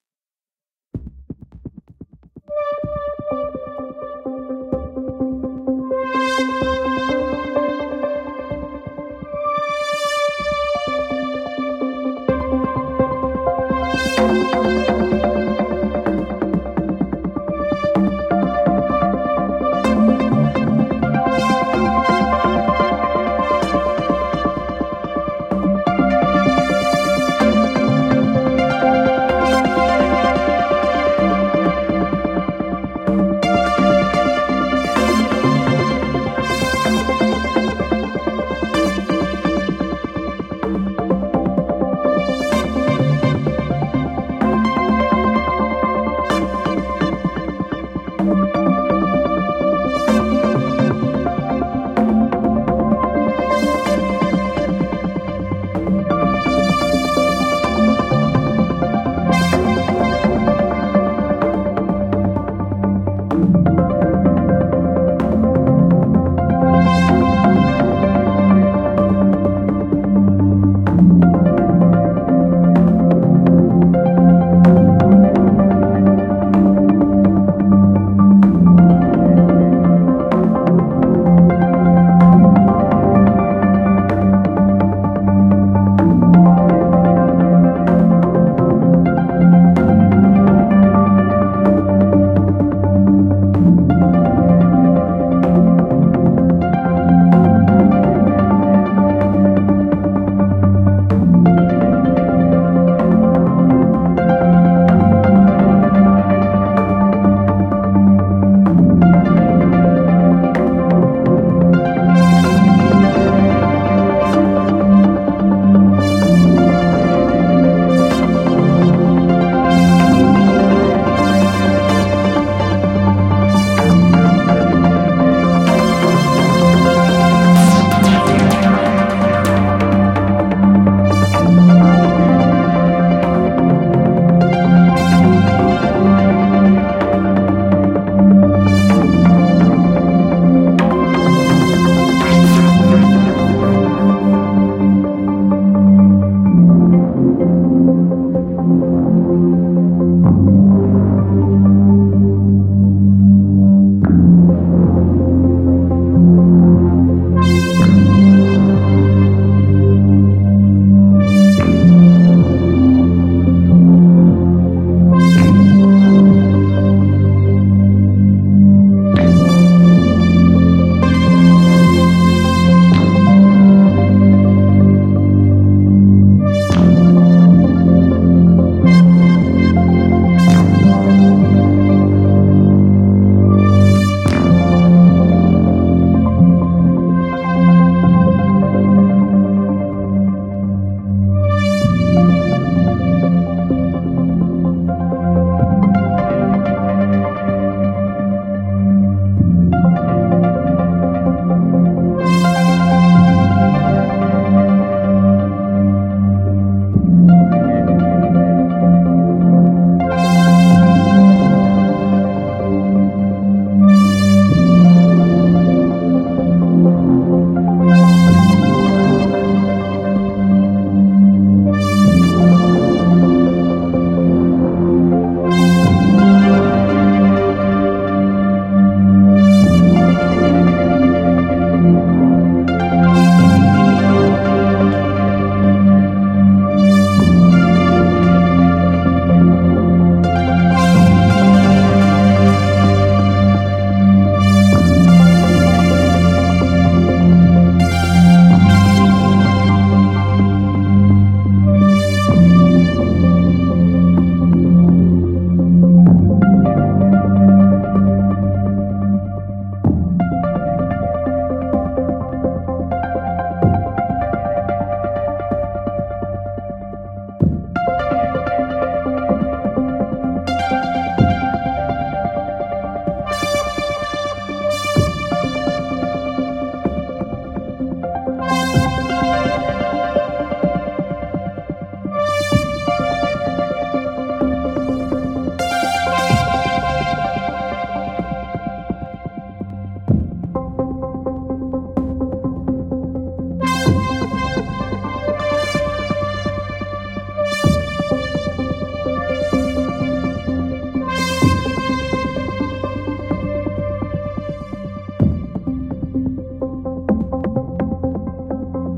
Uplifting ambient session, made in Ableton with several Sylenth VST's.